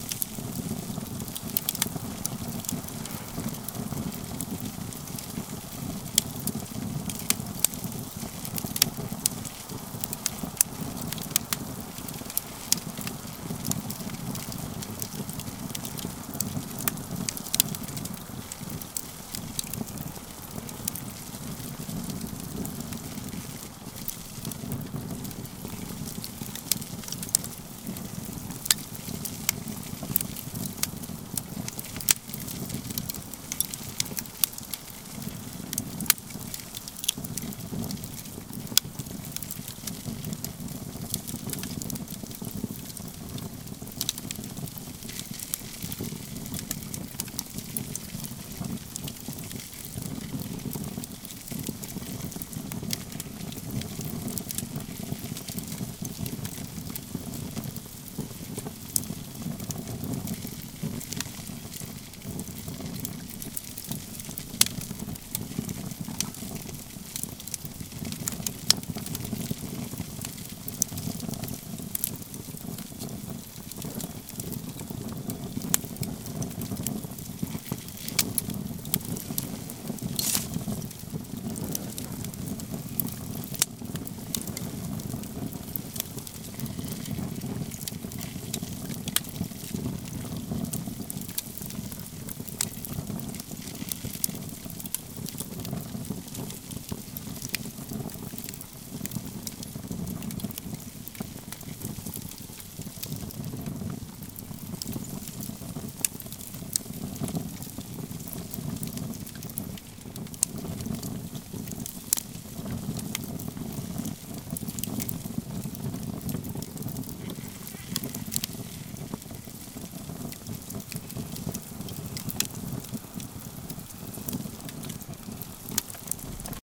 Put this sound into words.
Recorded with an ME66 during my camping trip to Pender Island in 2010. A medium sized camp fire with lots of pops and hisses.